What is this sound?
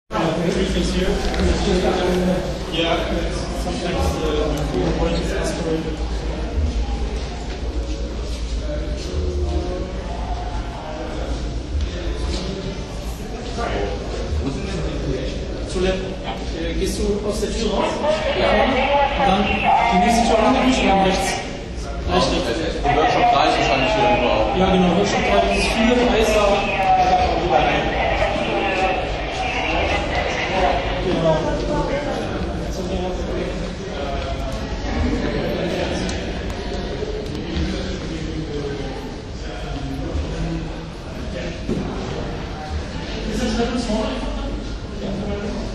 at the bus station
getting around Berlin on local transport
berlin, germany, public-transport, publictransport, tram, traveling, trip